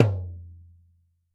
toy drum hard hit
hard hit on small toy drum, recorded on Tascam DR-5 didgtal recorder.